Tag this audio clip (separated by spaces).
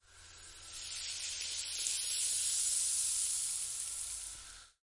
hose nozzle sea-spray ship spray water